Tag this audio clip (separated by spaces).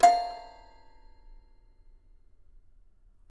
digisample keyboard sample studio toy toypiano